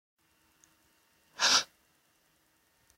Solo un susto